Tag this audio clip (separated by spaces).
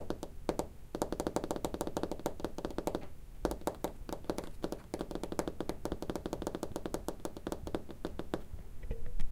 crab tapping underwater